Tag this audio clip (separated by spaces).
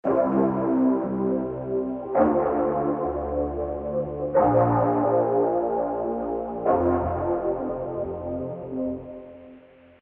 Blond Frank Lofi Ocean Piano